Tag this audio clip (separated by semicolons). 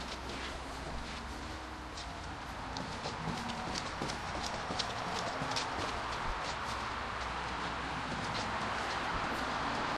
field-recording; foley; steps; stairs; running